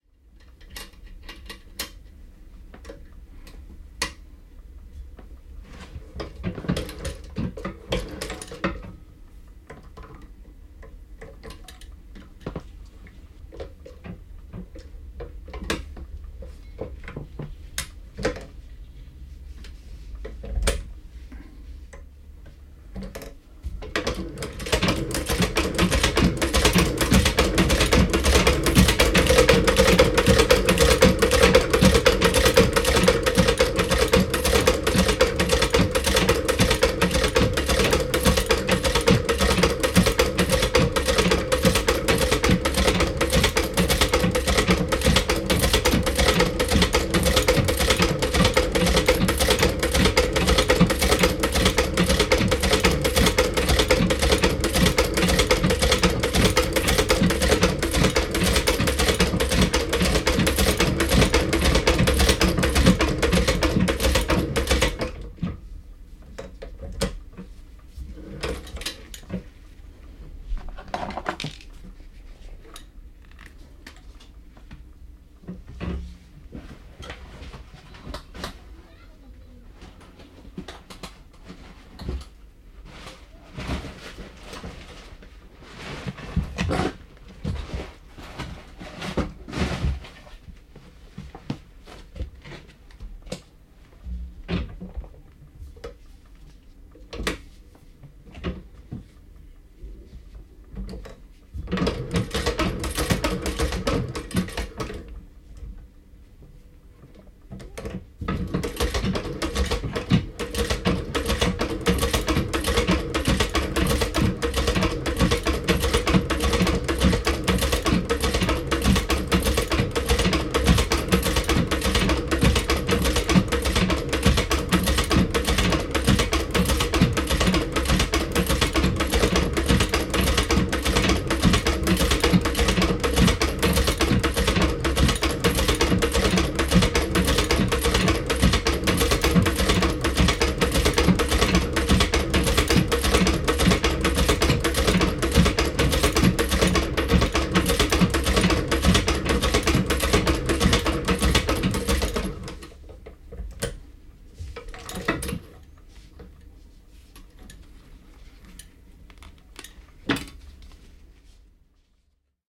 Ompelukone, polkukone / Old sewing machine with pedal from 1920s, a shoemaker at work
Vanha poljettava ompelukone 1920-luvulta. Suutari työssään, lonksottava ompelukone.
Paikka/Place: Suomi / Finland / Nummela
Aika/Date: 1948
Finnish-Broadcasting-Company, 1920s, Ompelukone, Ompelu, Yle, Soundfx, 1920-luku, Finland, Tehosteet, Pedal, Field-recording, Sewing, Polkukone, Yleisradio, Sewing-machine, Suomi